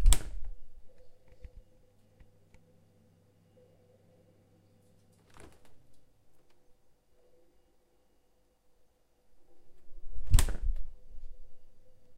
Recording of a fridge door opening and closing with humming of the fridge when door is opened.